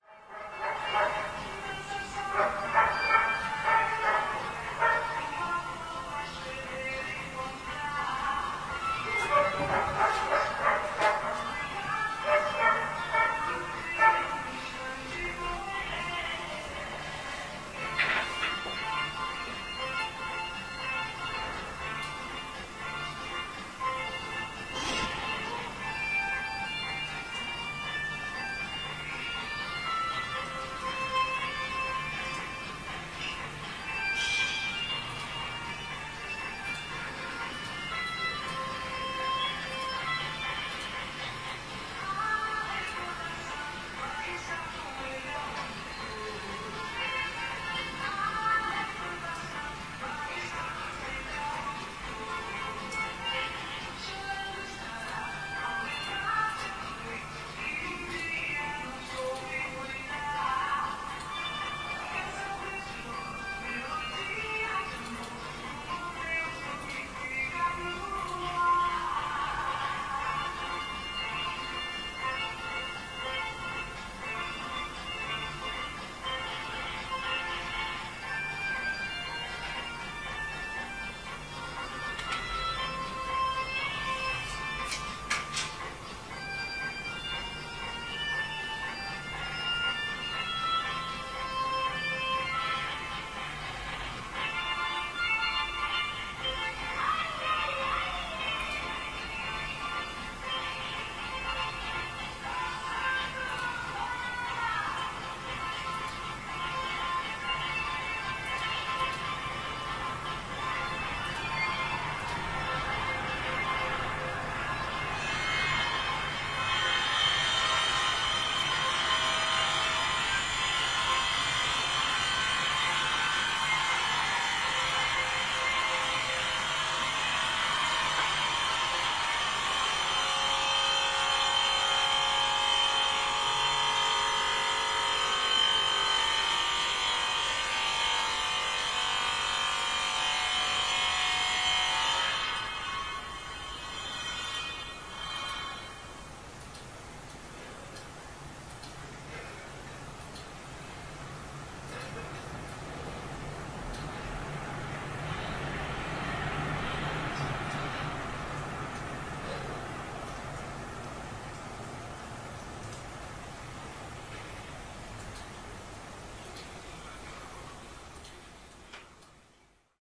26.04.2010: about 14.00. The tenement's courtyard ambience. Some of my neighbours has started listen to the music (famous and very popular in the 90's XX in Poland "LAMBADA"). I was recording from my room. I had the window open. There is audible my clicking. At the beginning of the recording the dog is barking and at the end workers are starting some grinder.